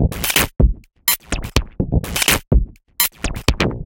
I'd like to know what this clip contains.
OddScience 125bpm05 LoopCache AbstractPercussion
Abstract Percussion Loop made from field recorded found sounds
Loop, Percussion